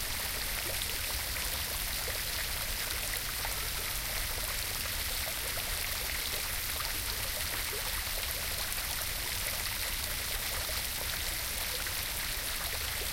Small streams of water falling into a larger pool. Lighter water sound.